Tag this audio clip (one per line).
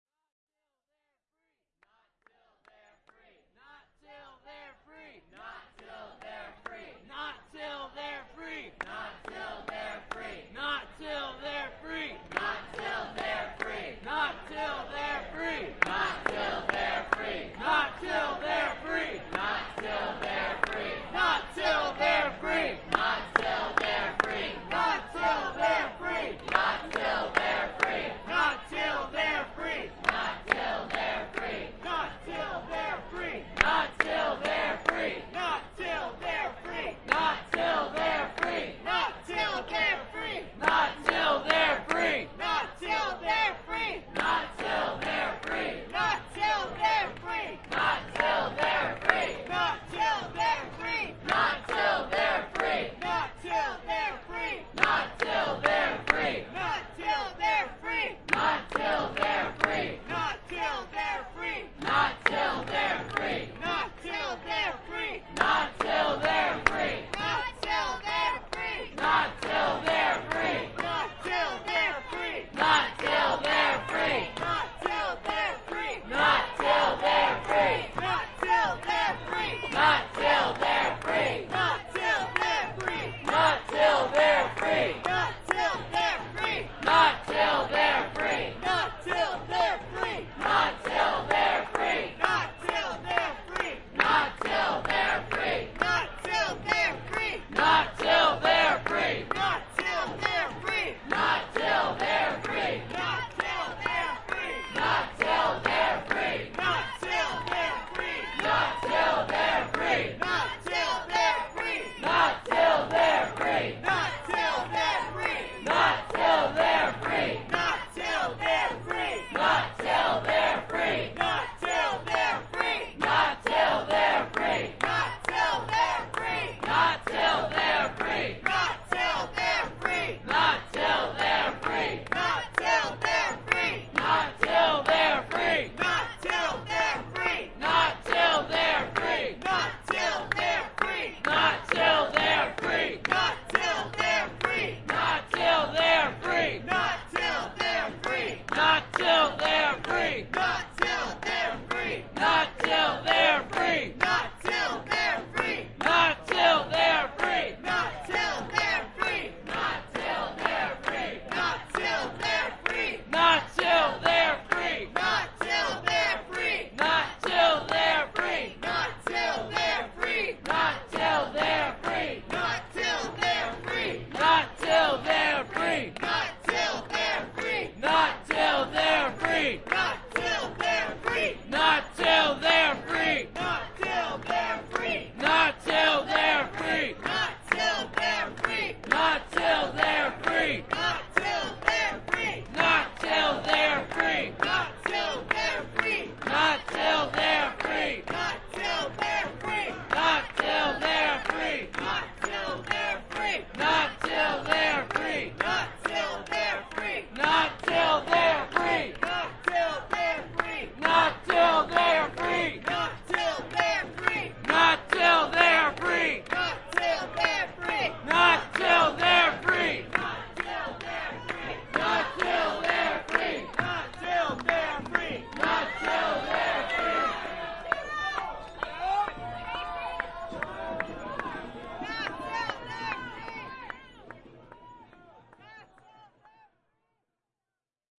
chant
field-recording
protest
travel-ban